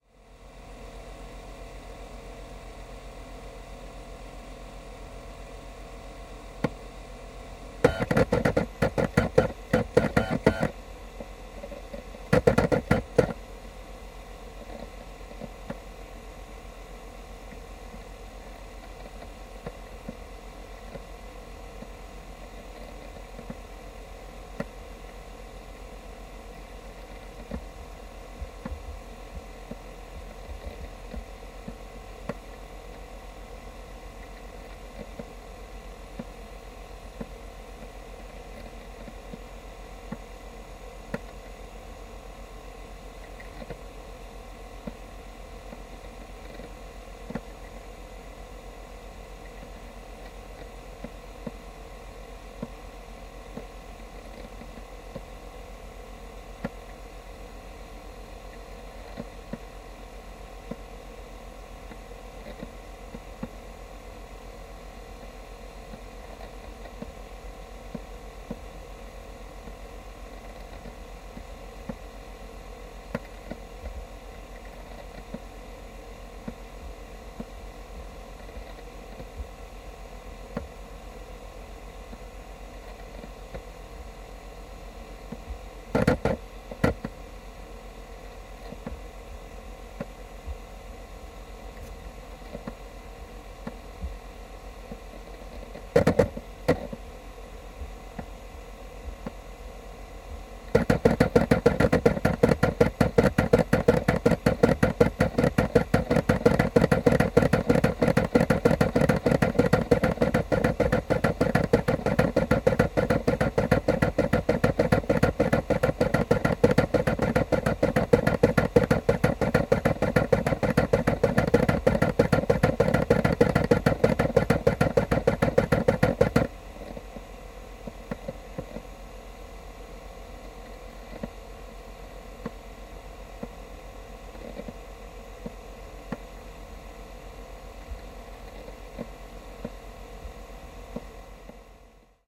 The sound of Apple's USB SuperDrive working, recorded with an acoustic guitar piezo pick-up.